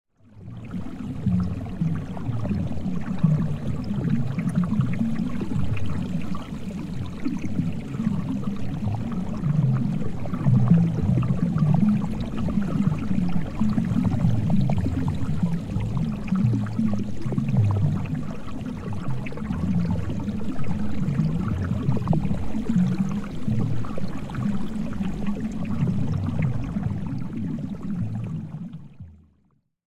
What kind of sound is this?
Enjoy my new generation of udnerwater ambiences. Will be happy for any feedback.
Check the full collection here: